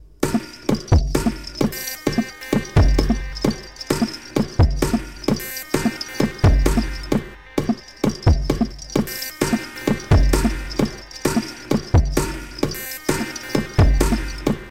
this file is made from toys.
beat, ambient, toys, electronia, processed, drum